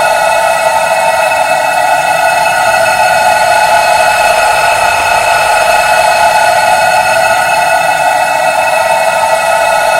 Designed as a Loop-able High Frequency Laser for weapons that shoot non-stop.